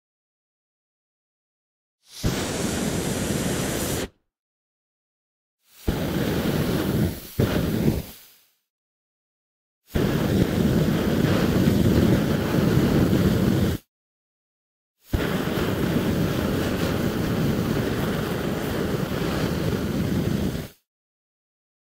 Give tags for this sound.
Flamethrower
weapon

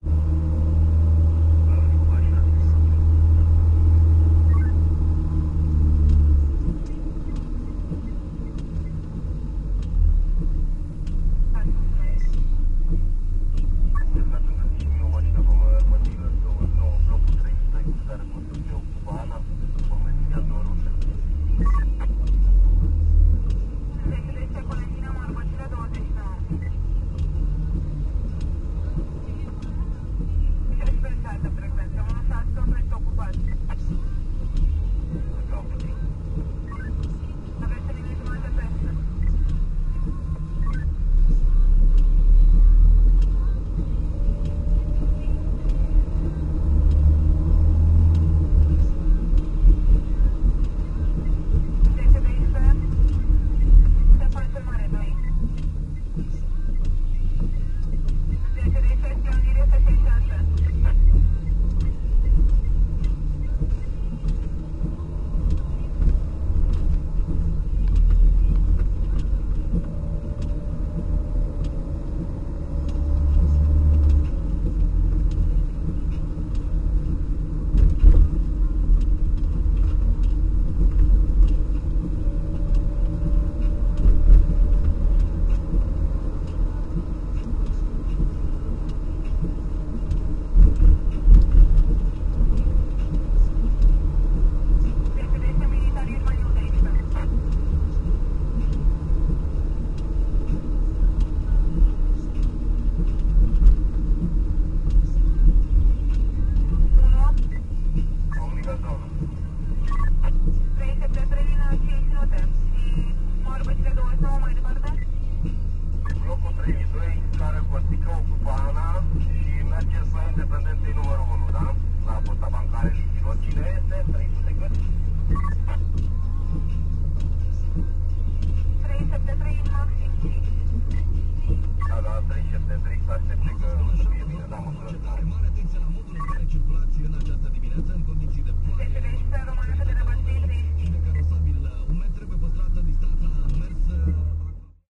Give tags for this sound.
movie-sound
field-recording
inside-car
taxi
Bucharest

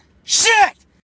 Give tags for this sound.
random
funny
yelling
guy
Shit

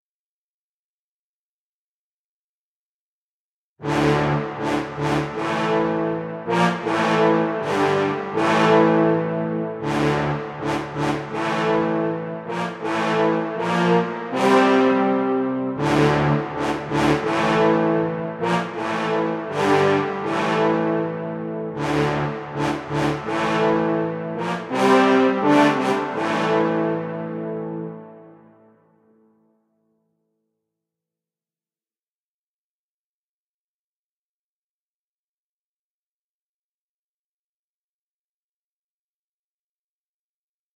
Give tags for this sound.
announcement
antique
antiquity
brass
music
Rome
trumpet

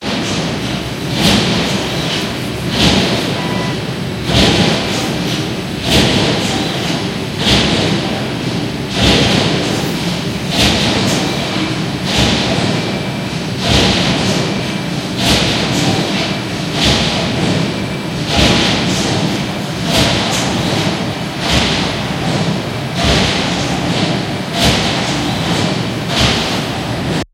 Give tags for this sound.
noise
mechanical
industrial
punch
machinery
field-recording
factory
metal

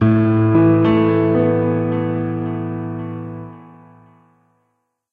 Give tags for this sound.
delay
piano
reverb

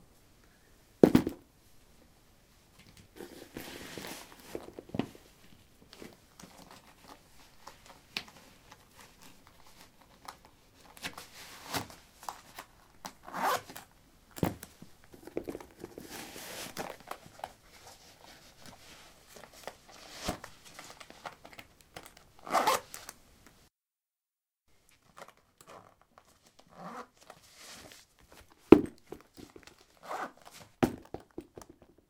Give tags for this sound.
footstep
footsteps
step

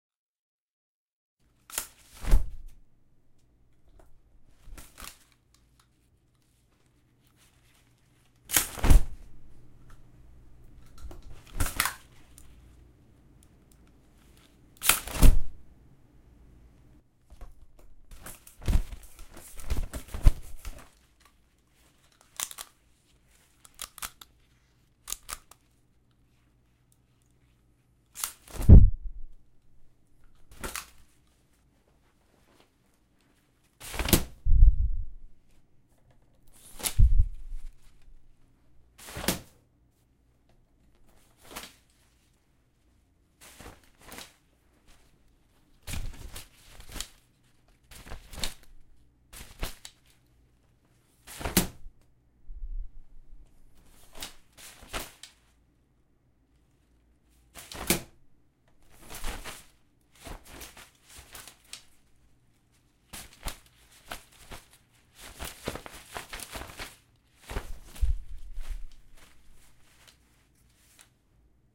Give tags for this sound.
click
pop
rustle
umbrella
whoosh

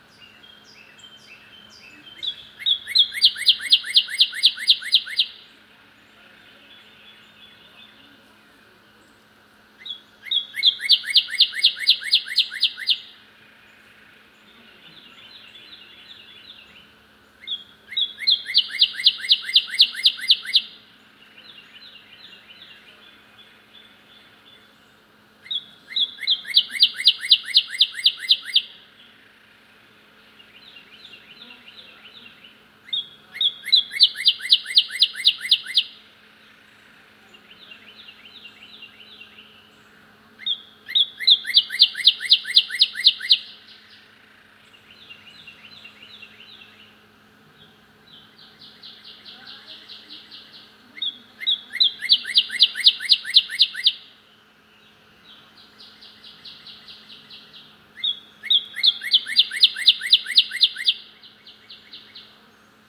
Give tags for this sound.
tweets
birdsong
spring
morning
nature
Cardinal
bird-song
field-recording
crisp
ambiance
male
chirp
ambience
birds
clearly
ambient
singing
song
vocalizing
Loud
bird
forest
chirping

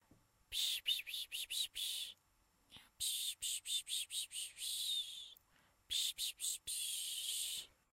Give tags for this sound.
call
Cat
voice

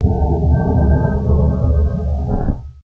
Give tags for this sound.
pops
effect
odd
open
soundeffect
ambient
sound
sfx
click
game
moan
menu
close
door
option

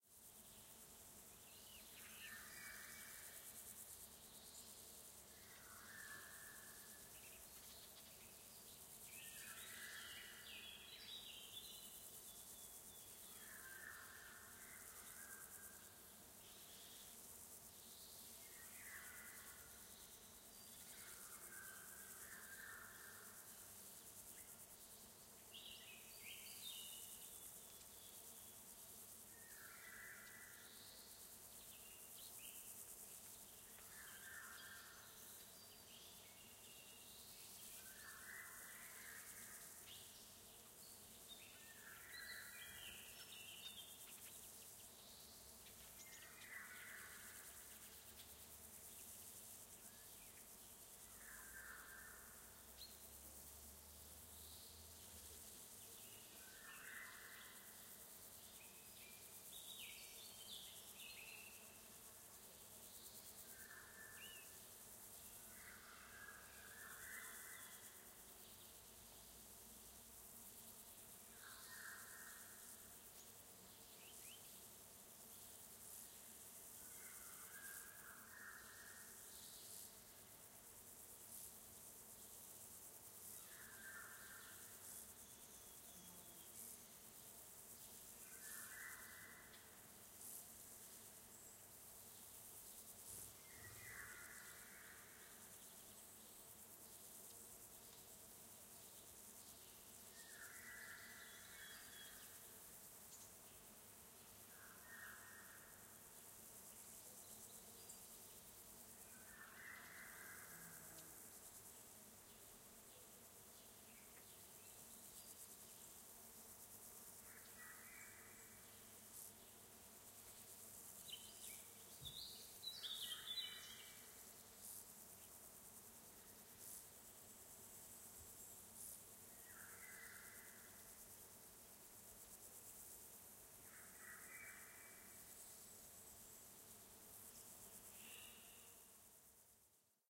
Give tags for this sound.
birdsong
field-recording
birds
bird
summer
nature
goldenoriole
forest

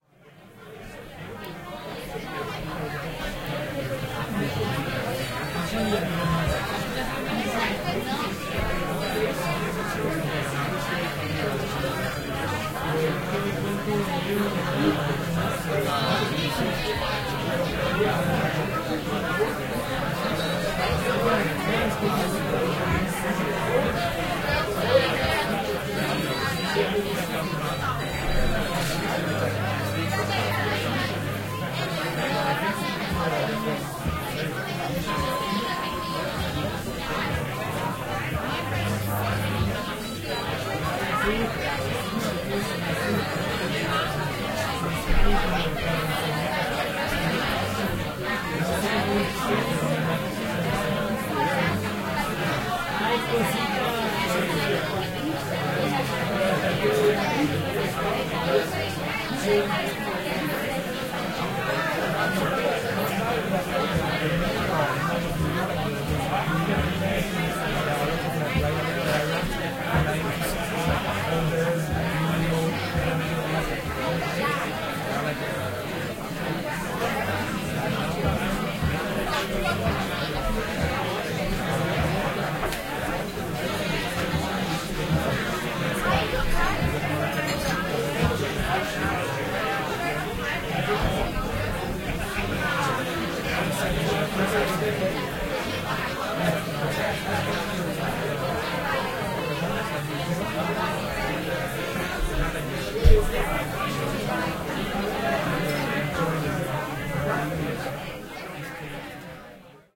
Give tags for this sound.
background
field
drinking
bar
recording
ambience
effect
jazz
people
talking
field-recording
ambient
Crowd
Club
sound

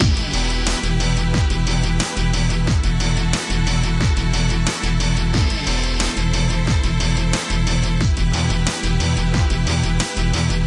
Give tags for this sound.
loop
loops
guitar
rock
music
orchestral-metal
epic
metal
intense

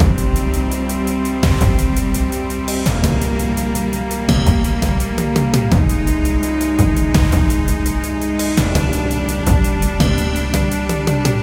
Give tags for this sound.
army
battle
cinema
combat
energetic
epic
epoch
fight
fighting
film
loop
military
movie
trailer
triumph
triumphant
war

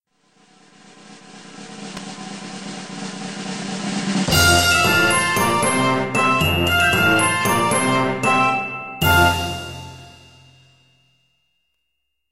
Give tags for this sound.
victory
score
game
trumpets
triumph
win
positive
bonus
alert
level
music
success
notification
fanfare
clip
resolution
happy

